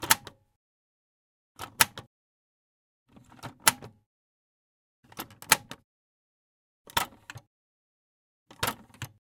Multiple samples of closing and opening a plastic audio cassette deck mechanism. The tape bay has a soft-stop mechanism when opening (giving a gentle stop after the eject lever is operated) and is pushed closed by hand.